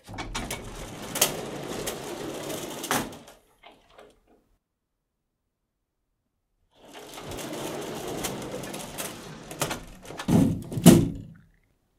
An old door sliding along a metal track, with clicking noises from the track and the gentle thump of the door reaching the end of the track, and then meeting the wall again at the other side.
sliding, track, metal, metal-track, old, door